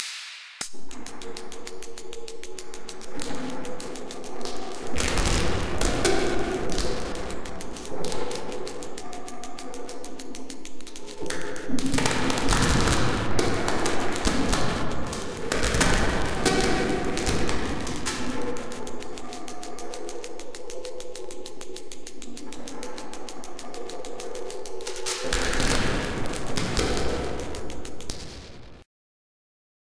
I recorded a feedback loop, generated by my Mackie
and Boss digital delay and played through a pair of cheap speakers,
with a SM57 to Minidisk and captured it on my computer. After that I
did a lot of processing in Soundforge using several plug-ins like DFX geometer/Ambience/Cyanide2. The result is a pulsing, popping and crackling sound. Makes me think of firecrackers.